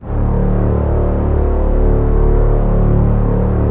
01-synSTRINGS90s-¬SW
synth string ensemble multisample in 4ths made on reason (2.5)
multisample, synth, d0, strings